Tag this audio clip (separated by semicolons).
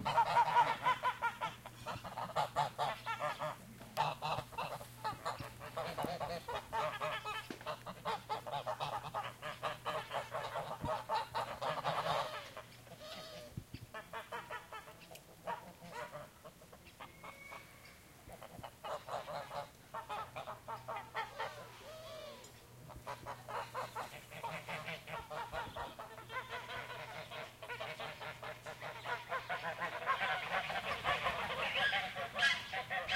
farmyard; geese; goose; honking